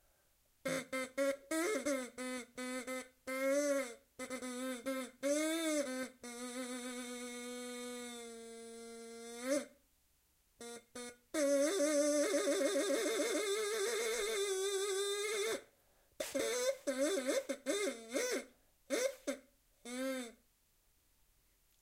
improvised short melody
ethno, improvised, melody